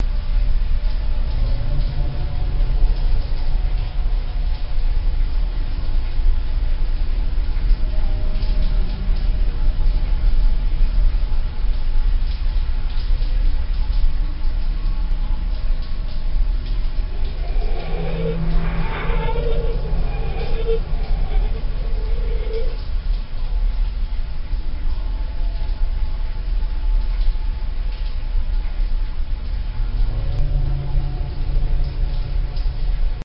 A short clip from last years CD I made for Halloween. I few years ago I got tired of the cheesy Halloween CD's out there so I made my own for family and friends... The whole track is an hour long. If anyone wants it (it's for free) just ask, I'll send you a link..
Background-Ambiance, Drone, Ghost, Ghostly, Halloween, Horror, Lonley, Lost, Negative, Nightmare, Scarry, Spooky